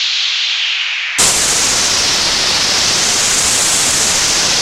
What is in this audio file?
PAILLERY Celtill 2013 2014 son4
Sound creaded with Audacity from noises to ended to a firehose flow sound.
Two differents speed of flow has been fixed in this sound, first a less powerful flow and then a stronger one.
Applied effects :
- First part : fade out, changing speed (about 200%), changing pitch (about 20%), equalization (amplification down before 1kHz et after 10kHz),
- Second part : short opening amplification (3dB) changing speed (45%), changing pitch (-40%), wahwah (LFO frequency :0.4Hz, Deepness : 27%, Offset frequency : 74%, Resonance : 2.5)
Typologie : continu complexe
Morphologie :
Masse : son complexe
Timbre : acide, brutal
Grain : rugueux
Allure : le son ne comporte pas de vibrato
Dynamique : attaque violente et abrupte
Profil mélodique : variation serpentine
Profil de masse : calibre